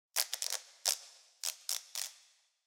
Electricity Sound
Made with Duct tape, reverb and pitch plugin.